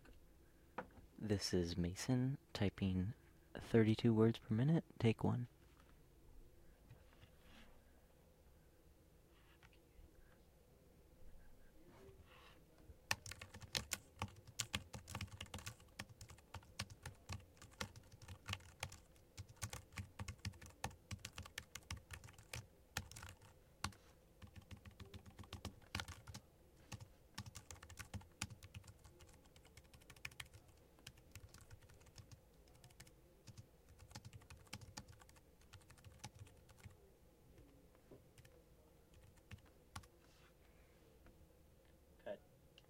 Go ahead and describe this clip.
mason typing excessively
typing, type